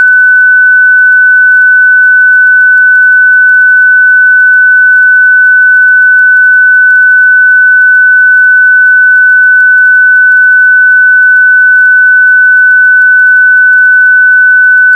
A 15 second sample of 15khz. produced in audacity for a request.
15khz, noise, tone